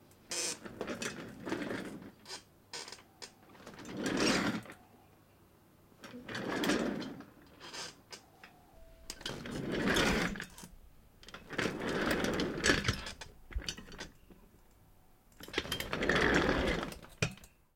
Office chair rolling on ground: This sound is of a wheeled office chair rolling fast and slowly on a hard floor. This sound consists of several one shot variations in one take. This sound was recorded with a ZOOM H6 recorder and a RODE NTG-2 Shotgun mic. No post-processing was added to the sound. The sound was recorded by someone sitting on a wheeled office chair and rolling it around on the floor in a small room as they are being recorded with a shotgun mic.